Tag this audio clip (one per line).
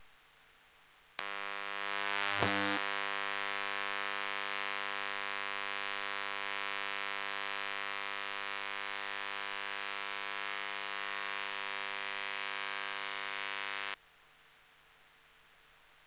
8bit
electromagnetic-field
elektrosluch
lamp